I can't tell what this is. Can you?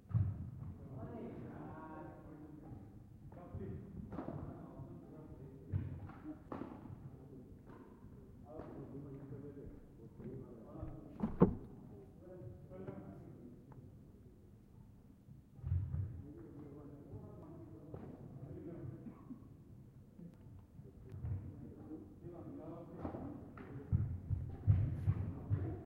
People playing badmington indoors in the local sports centre.